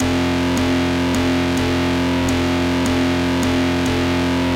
105 Undergrit Organ Layer 01
slighty gritty organ layer